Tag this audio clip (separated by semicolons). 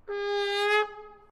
sampling; alive; recording; midi